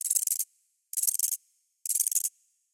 Tiny insect/Rat 2 (3 pitches)
Insect/rat sound for Thrive the game. Made with Harmor, Vocodex and other plugins from Image-Line in Fl Studio 10.
It has some reverb.
insect, creature, insects, animal